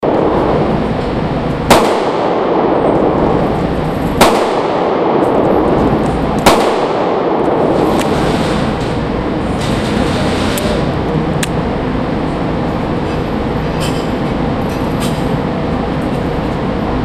GunRange Mega3
Shooting 9mm in gun booth
9, millimeter, shots, facility, indoor, range, gun, nine